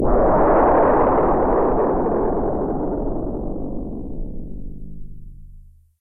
An explosion handcrafted throught SoundForge's FM synth module. 2/7